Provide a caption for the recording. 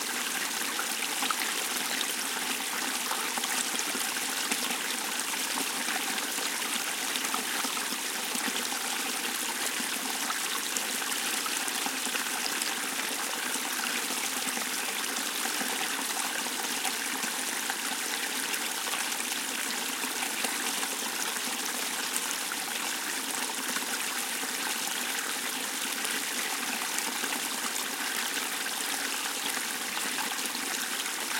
stream4 close
recorded with Sony PCM-D50, Tascam DAP1 DAT with AT835 stereo mic, or Zoom H2
close, flow, stream